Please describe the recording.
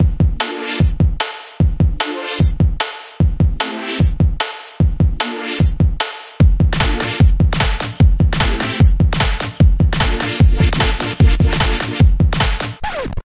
Short Hip-Hop Song
Great for ads, transitions, intros, or logos! Enjoy!
Made in GarageBand
Ad, Chill, Commercial, Hip-Hop, Intro, Logo, Pop, Rap